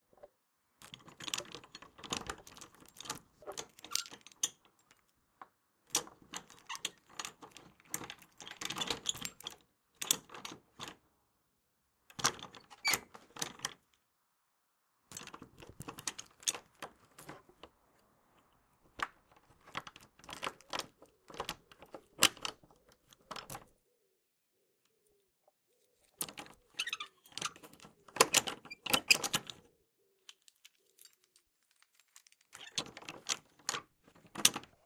Old Padlock
A medium-duty padlock for my old shed being handled.
door, lock, metal, padlock, rusty, shed